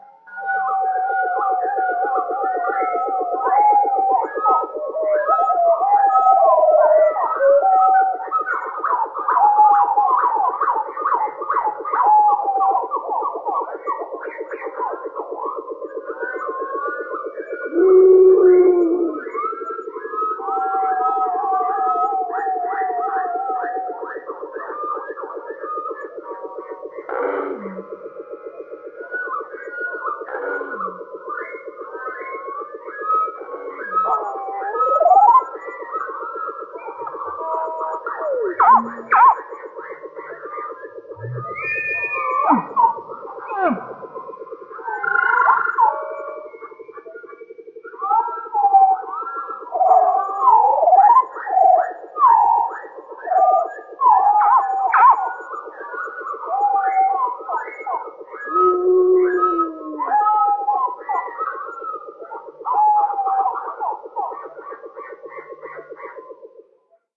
An attempt at creating a Jurassic type soundscape entirely from manipulated birdsong.